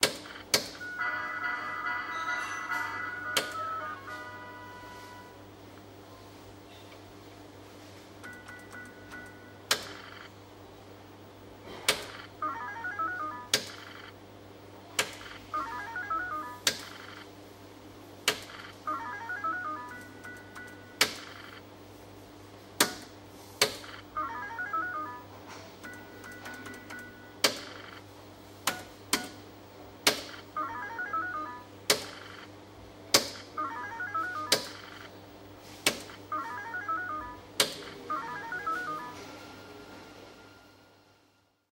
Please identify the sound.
video poker in a bar in rome